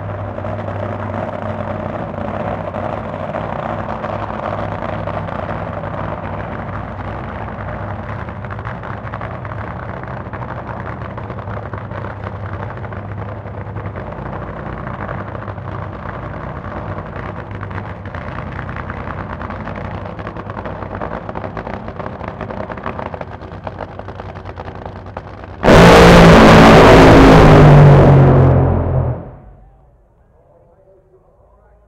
Top Fuel 1 - Santa Pod (B)
Recorded using a Sony PCM-D50 at Santa Pod raceway in the UK.